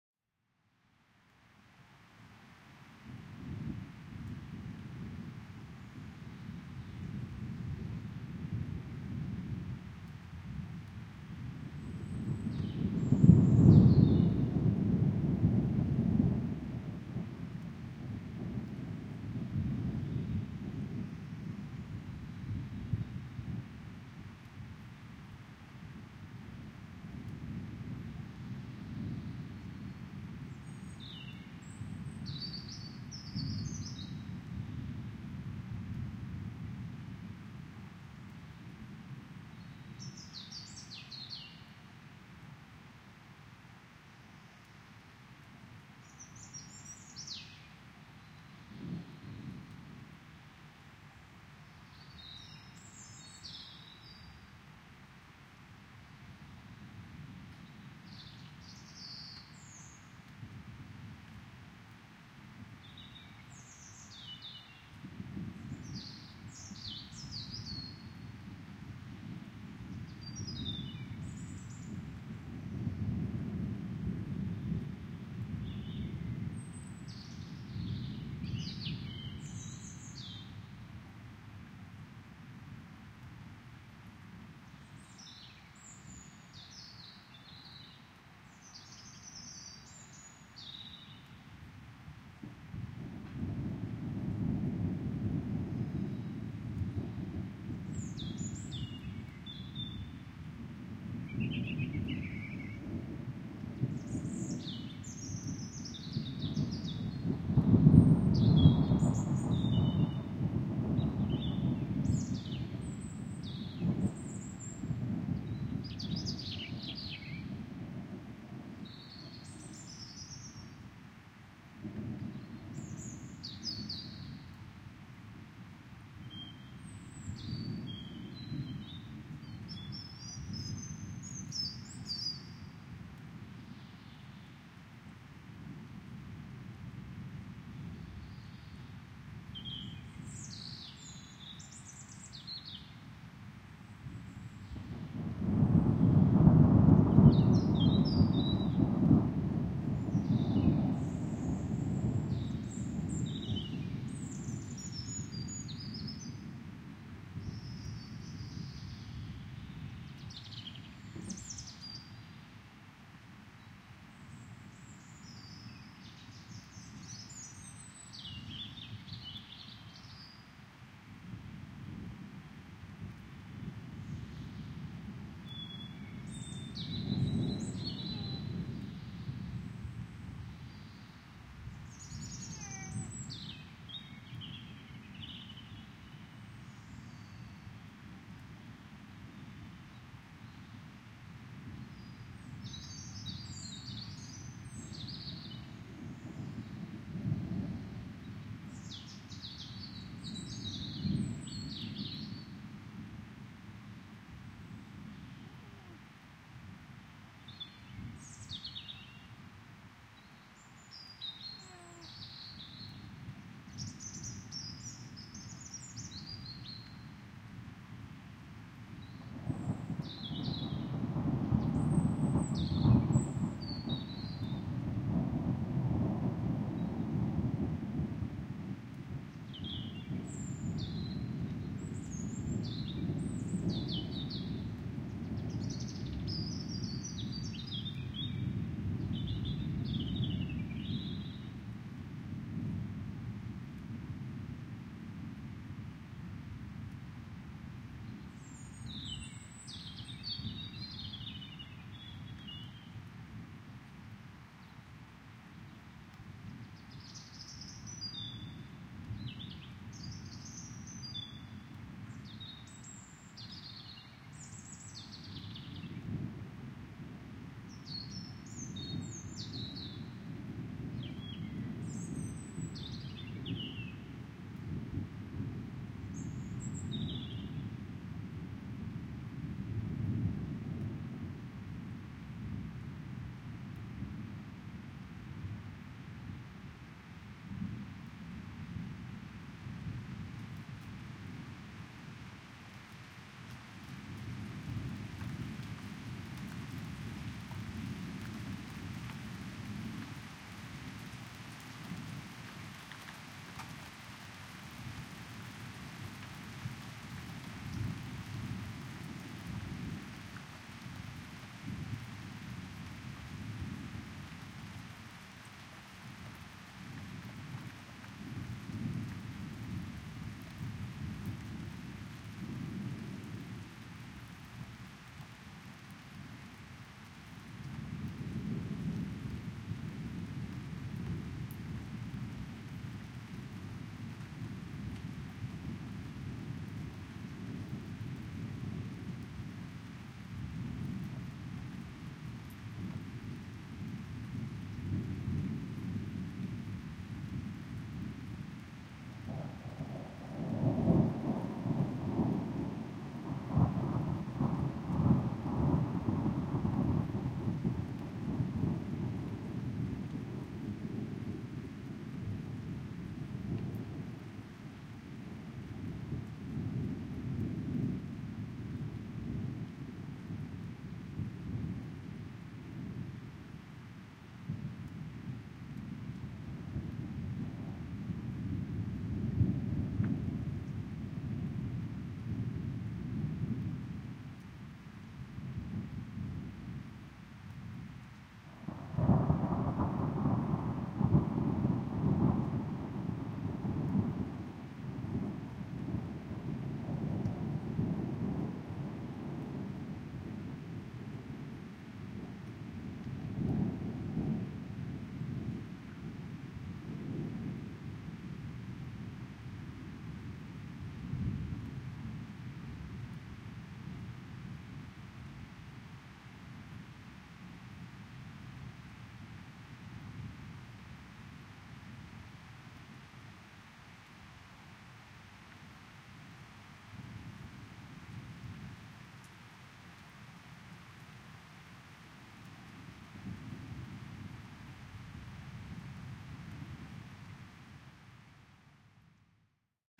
Thunder and rain in a valley in germany hesse mai 2018
Silent ambiance with bird calls rolling thunder and upcoming rainfall.
Also raintrops from trees and a nearby house and a short time with a cat meow.
A steam is some meters in the back.
Recorded with the mixpre-3 from Sound Devices and the Sennheiser MKH 8020 stereo pair in a special ortf setup.
Very natural an warm sound.